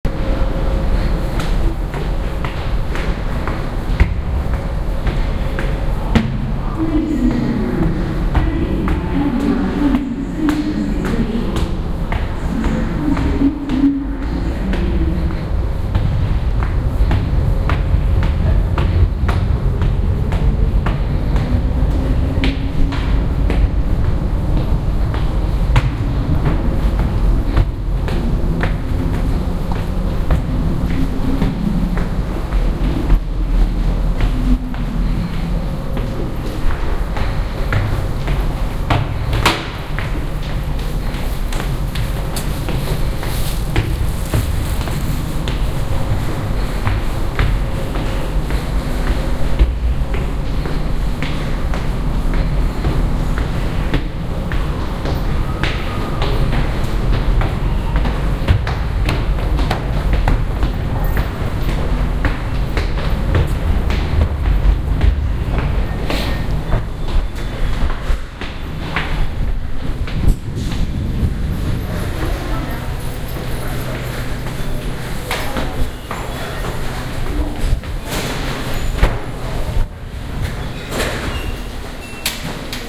Russell Square - Climbing 175 steps to ground level of underground station
ambiance, ambience, binaural, field-recording, london, station, underground